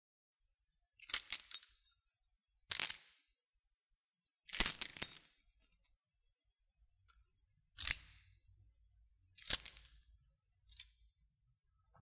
Sound of grabbing and shaking of keys on keyring
bunch, shake, keys